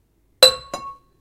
handling glass on cement
cement, glass